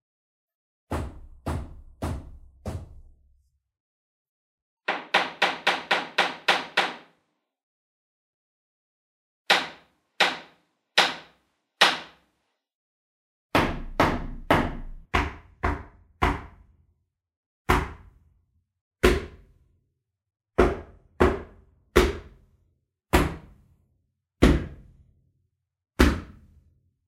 Different soundstyles of hammering, recorded in the livingroom. Some slow, some hard, some fast, and also different surfaces
hammering, home, improvement, job, timber, Working, wood